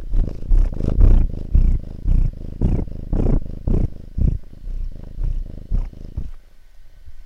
The clear purr of an English Black Short-hair cat.
cat, purr